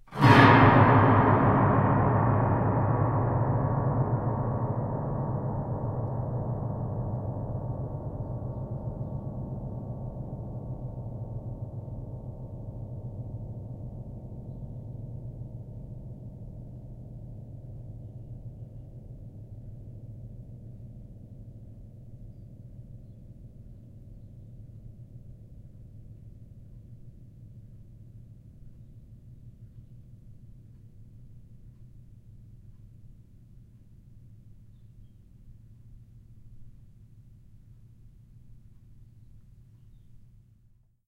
Piano, String Glissando, Low, A
Raw audio created by rapidly sliding a fingernail across the lower strings of a baby grand piano in a descending motion. The sustain pedal is also held to allow the strings to continue reverberating.
An example of how you might credit is by putting this in the description/credits:
And for similar sounds, do please check out the full library I created or my SFX store.
The sound was recorded using a "H1 Zoom recorder" on 25th February 2016.
glissando, horror, piano, string, Strings, sustain